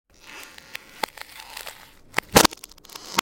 Ice 1 - reverse
Derived From a Wildtrack whilst recording some ambiences
BREAK, footstep, walk, field-recording, winter, frozen, sound, freeze, snow, effect, crack, ice, cold, step, foot, frost